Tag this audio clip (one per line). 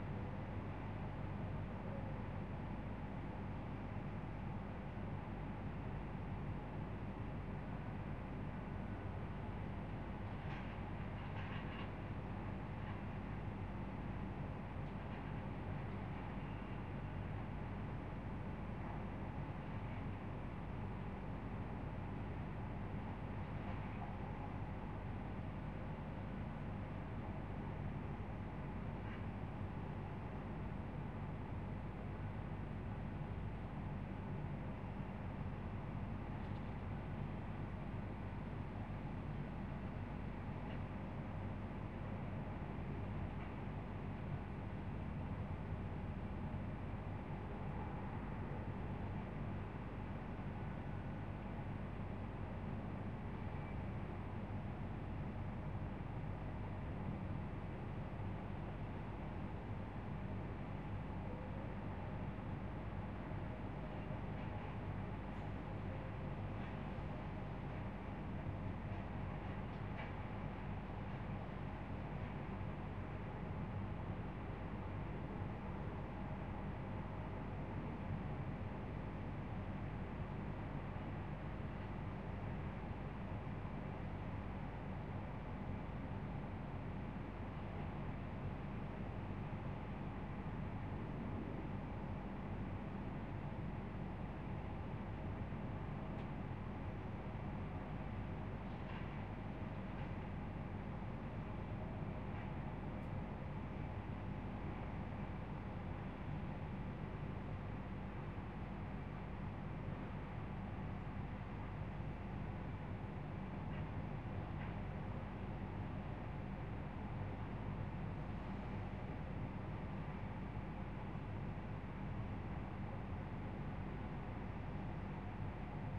Ambience
Indoors
Industrial
Office
Room
Tone